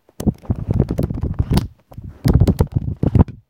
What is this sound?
This is the sound of book pages being flipped